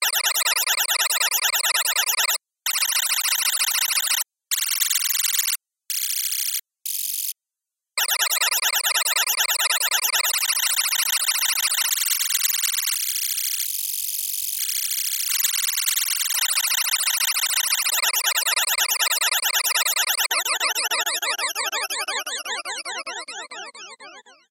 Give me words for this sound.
ComputerTrillsOfThe80sSciFiGenre
Warbling tones hitting left and right at varying speeds.